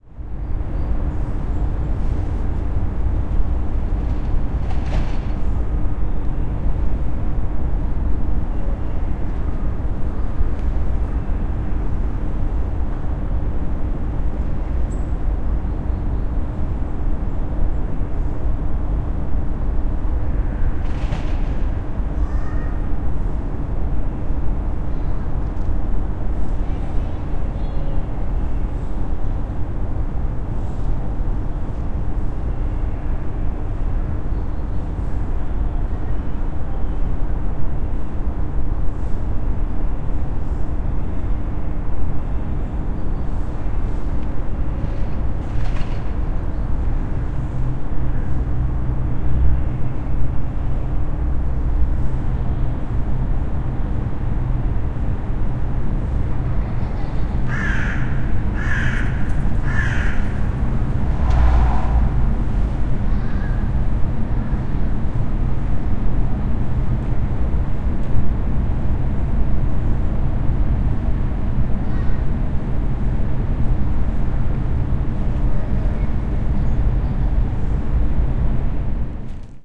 Stadt - Winter, Morgen, Innenhof
Urban ambience recorded in winter, in the morning hours in a backyard in Berlin
backyard, field-recording, Berlin, ambience, morning, winter